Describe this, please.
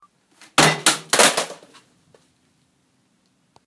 laptop drop1
Laptop dropping on cement and breaking.
laptop-drop, laptop-break, laptop-smash